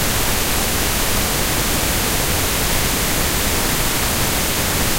independent pink noise quant
Independent channel stereo pink noise created with Cool Edit 96. Quantized distortion.
distortion, noise